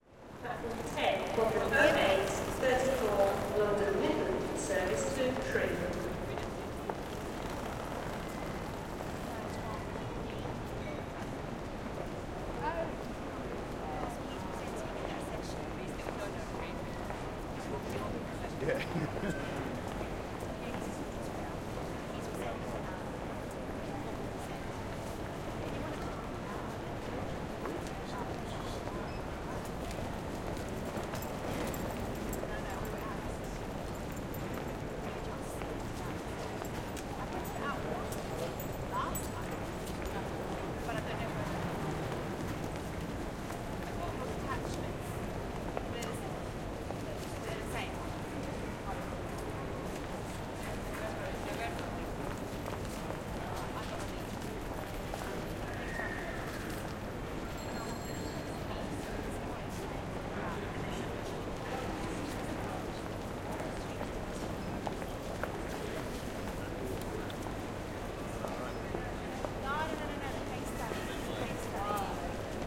EustonStation ST
Stereo ambience of Euston Station, London recorded with the Zoom H6´s XY-stereo capsule.